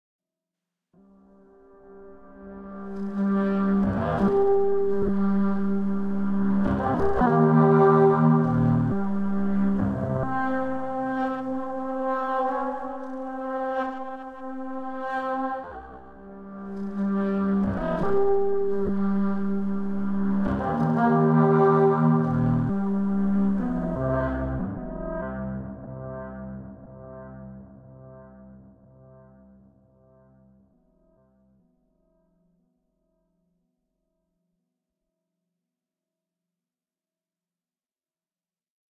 heavily processed saxophone recording.
KM201-> ULN-2-> DSP